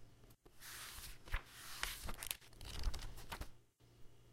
Flipping Through Book
Flipping through the pages of a book.